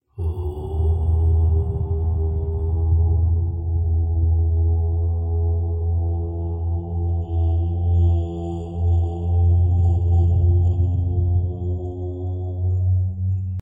Sound of om/ ohm. The sound of the universe as per the yogic science.
buddhism, mantra, meditation, ohm, om, throat, yogic